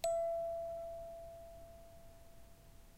one-shot music box tones, recorded by ZOOM H2, separated and normalized